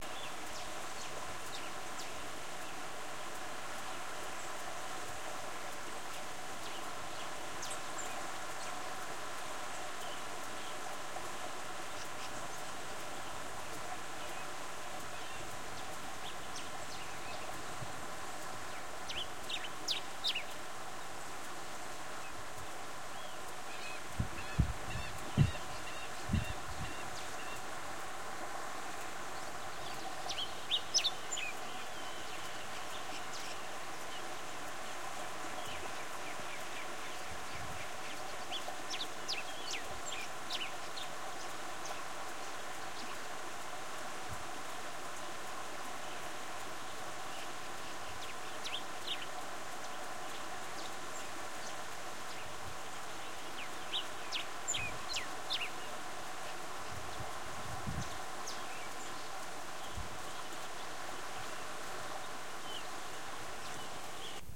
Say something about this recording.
Amb.Exterior estero y pajaros
Rural sound recorded at Chilean south island "Chiloe".Water stream,typical bird "Queltehue"
field-recording, birds, water-stream